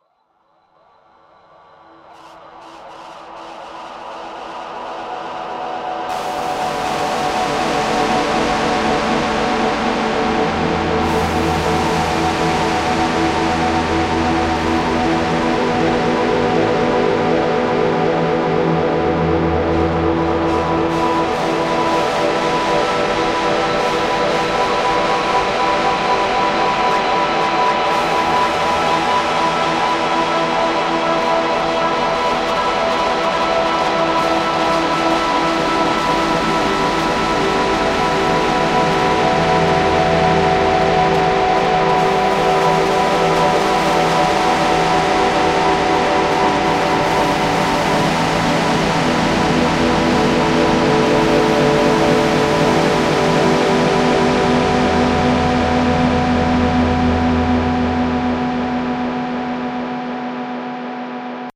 Intense-Dark-Guitar
Intense noise and dark surround ambient, i made it with: one take, one guitar, six effect. Good for movie-fx.
ambient, dark, guitar, intense, noise